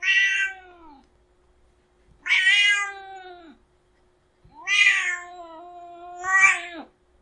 I recorded my mom's cat, who was angry because she was not given a treat.